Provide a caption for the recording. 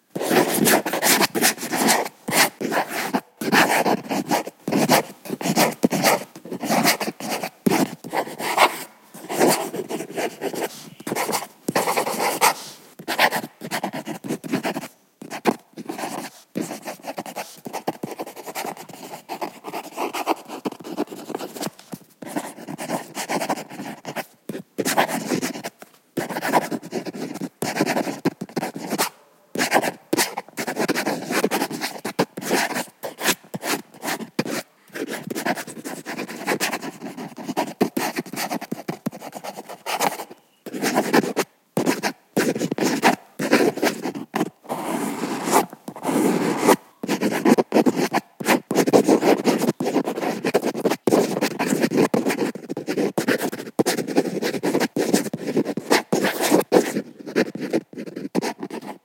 Handwriting, Speedball C2 calligraphy nib on ordinary copy paper. Recorded from a close distance, filtered at 200Hz to remove excess bass. Recorded by Mathias Rossignol.